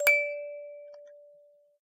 clean re re
eliasheunincks musicbox-samplepack, i just cleaned it. sounds less organic now.